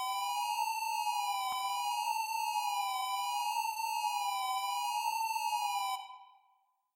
Two tracks of square waves increasing and decreasing in pitch, generated in Audacity.
Yes, it can be used commercially!
game, square, 8-bit, machine, siren